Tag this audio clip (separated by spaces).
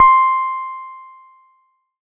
Jen-Piano Pianotone Piano Keyboard Electronic-Piano